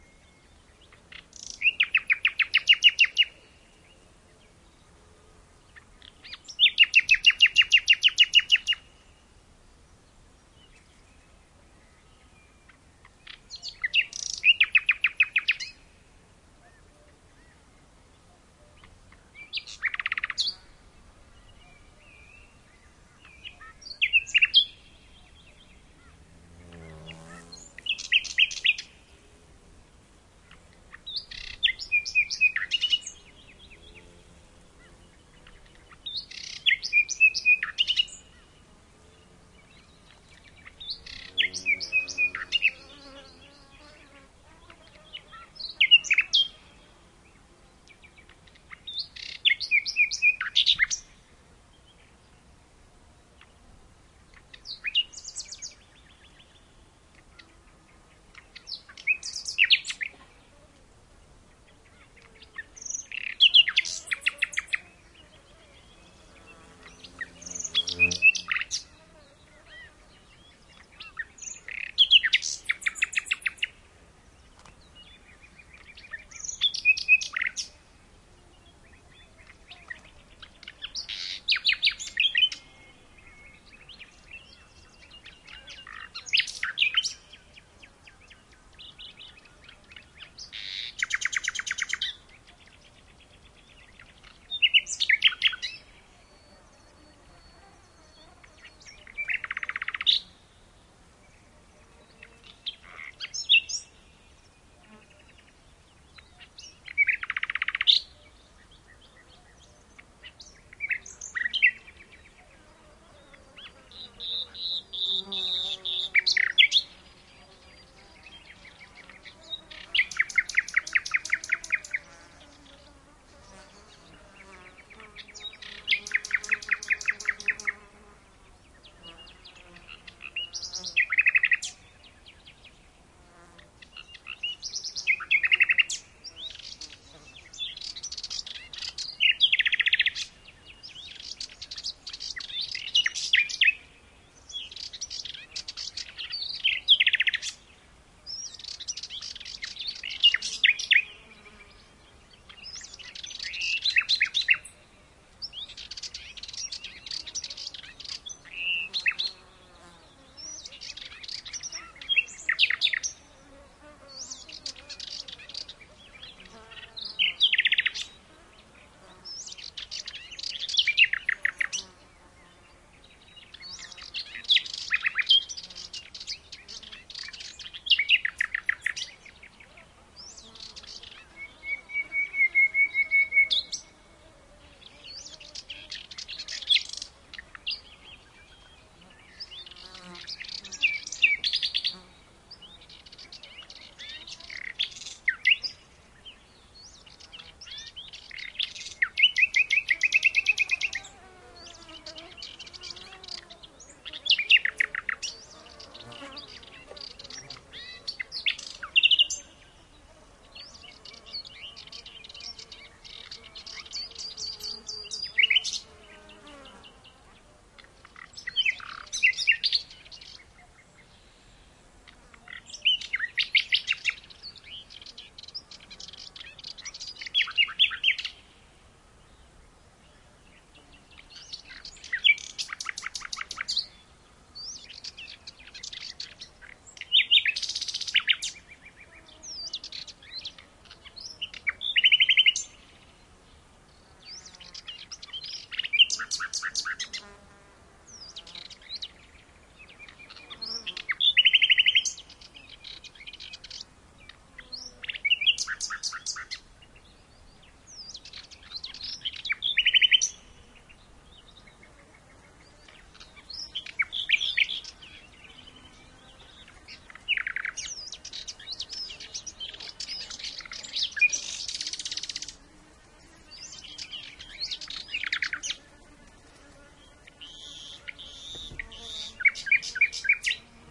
20070427.nightingale.scrub.B
Nightingale song recorded in the scrub with other birds (Serin, Warbler, Cuckoo) in background, insects flying around.
birds, field-recording, nature, nightingale